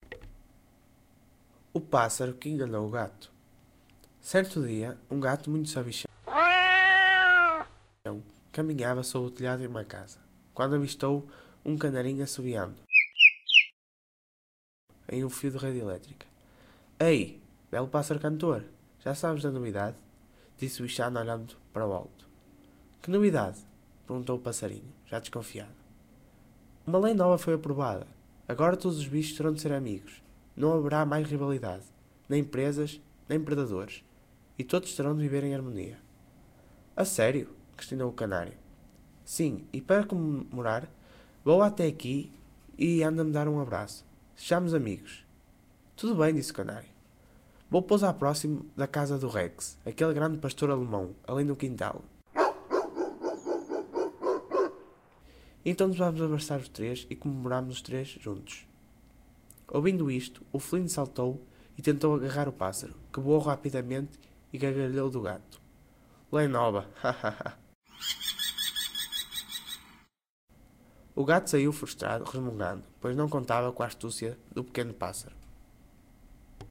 21 Renato Mendes Mod 4 exer 4
work, music